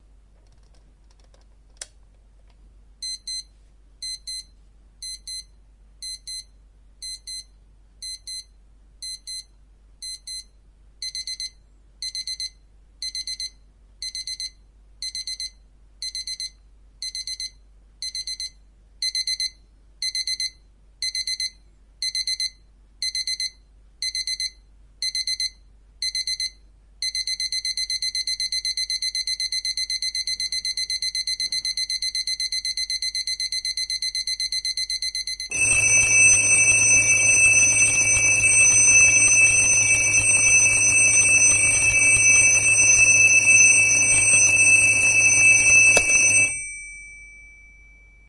CasioHMN-Zoom-h2
Testing sound recorded by Samson Zoom H-2 with Low gain microphone
compression, clock, sample